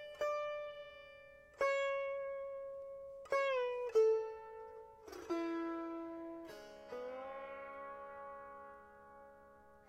mark sitar 03
My friend Mark Ilaug played improvisational sitar pieces in his living room. Recorded with a Zoom H2.
acoustic, Indian, music, raga, sitar